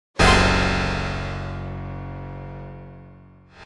Shock Stab 06
A loud orchestral stab for a horror reveal.
I'd love to see it!
chord, cinematic, dissonant, drama, dramatic, ensemble, film, fm, haunted, hit, horror, loud, moment, movie, musical, orchestral, realization, reveal, scary, short, spooky, stab, sting, stinger, suspense, synth, terror, thrill